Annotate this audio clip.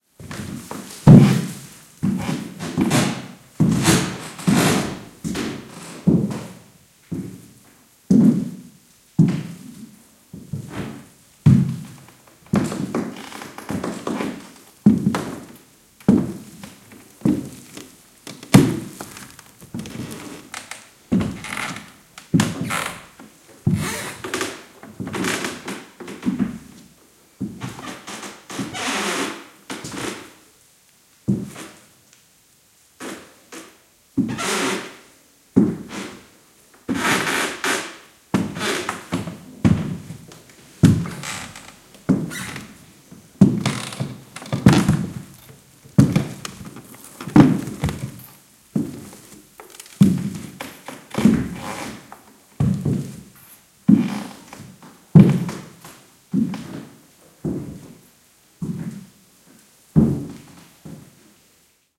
Close take of someone walking on a creaky wooden floor. EM172 Matched Stereo Pair (Clippy XLR, by FEL Communications Ltd) into Sound Devices Mixpre-3 with autolimiters off.